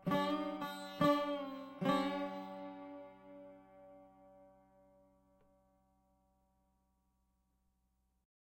Sarod w/no processing. Ending riff.